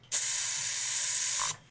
spray can
Spraying a can of wood cleaner
spray, spraying